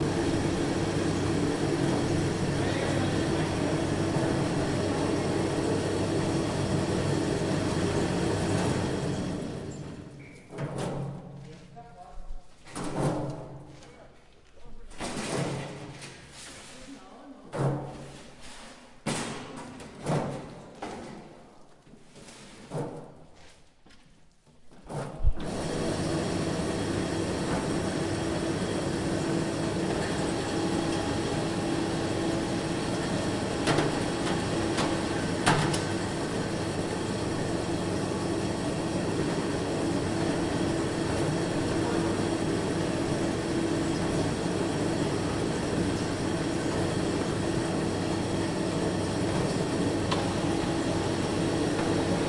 Recording of machine sound in a winery when processing the grapes. Recorded using a Zoom H4.
winery, working, grapes, machine